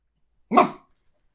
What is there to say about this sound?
Dog Woofing Single 2
Jack Russell Dog trying to bite something.